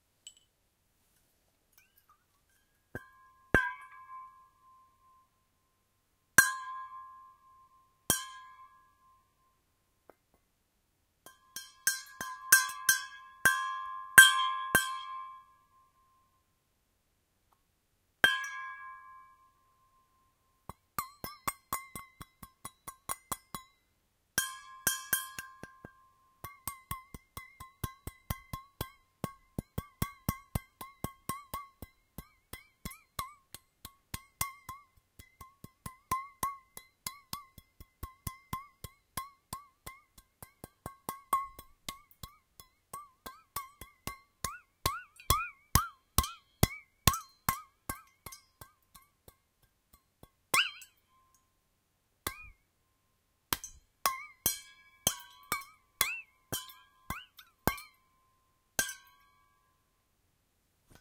Stainless Steel Bottle with Water Percussion Improv
I'm percussively hitting and swinging my "klean kanteen" stainless steel waterbottle, filled with a little bit of water.
"27 oz 800ml 18/8 stainless"
Wikipedia on 18/8 stainless:
"SAE 304 stainless steel, also known as A2 stainless steel (not the same as A2 tool steel) or 18/8 stainless steel, European norm 1.4301, is the most common stainless steel. The steel contains both chromium (usually 18%) and nickel (usually 8%) metals as the main non-iron constituents.[1] It is an austenite steel. It is not very electrically or thermally conductive and is non-magnetic. It has a higher corrosion resistance than regular steel and is widely used because of the ease in which it is formed into various shapes. It contains 17.5–20% chromium, 8–11% nickel, and less than 0.08% carbon, 2% manganese, 1% silicon, 0.045% phosphorus, and 0.03% sulfur.[2]"